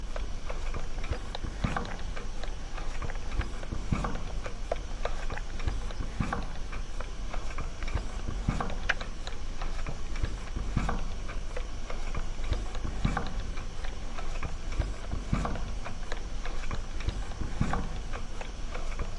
gas meter 2
gas meter
a gas meter in a corridor next to a boiler room (family house)
mechanical sound
periodic clacking sound
quiet noise of running gas boiler in background
recorded from approx. 4cm
recorded with:
built-in mics (collinear position)